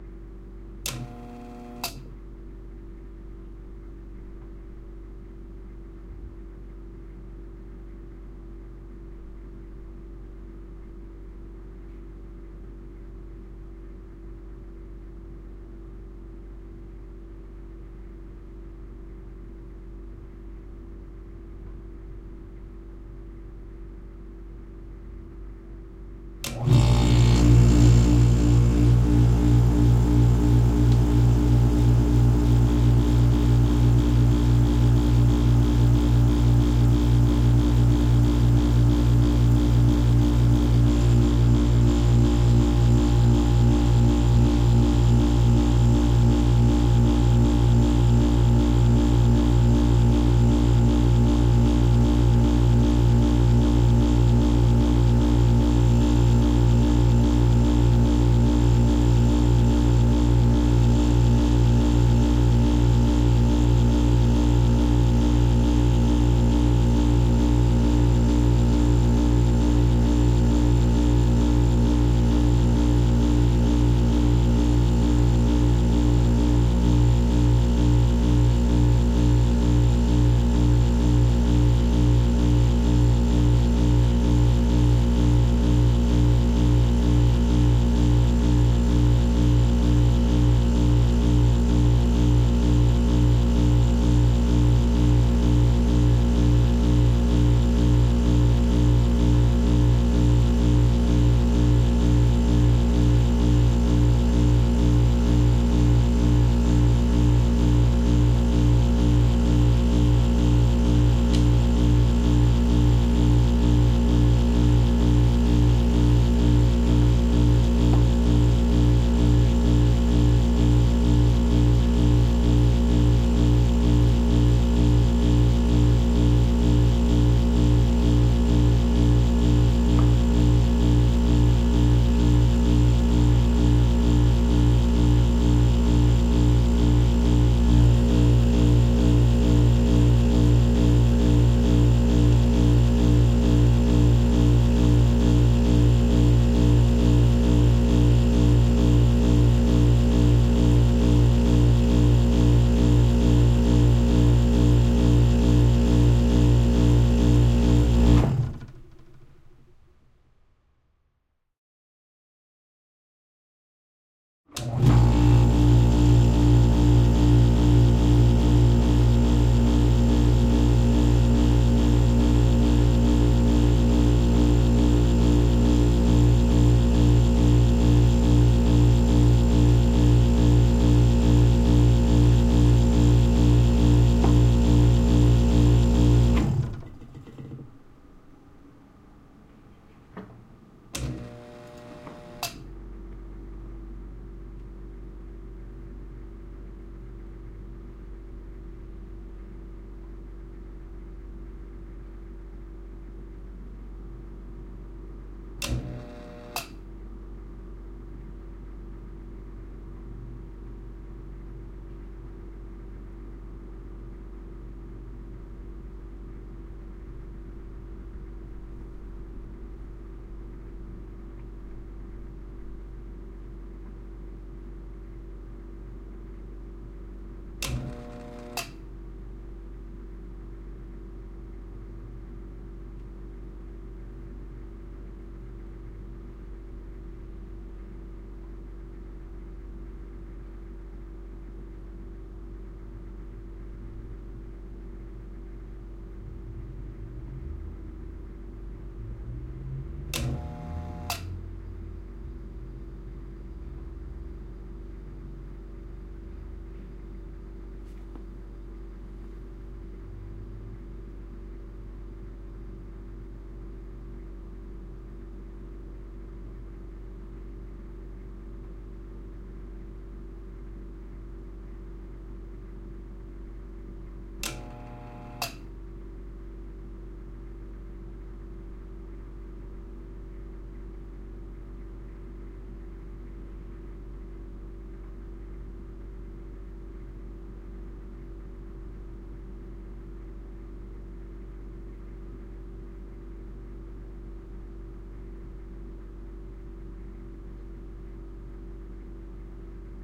fridge demon pulsating compressor click on with false starts close +roomtone with low-level fridge
click, close, compressor, demon, false, fridge, pulsating, room, starts, tone